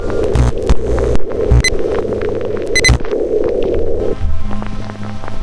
2-bar loop that is a combination of 3 samples: some bleeps, a dark pad, and some glitchy noise; made with processing in Audiomulch and Adobe Audition
sound-design, noise, 2-bars, pad, loop, glitch, bleep, rhythmic, processed